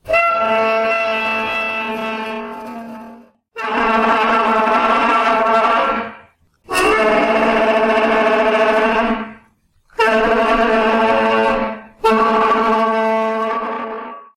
grazer call
A trumpeting roar-like sound I made by dragging a heavy metal chair across a cement floor. Edited in Audacity.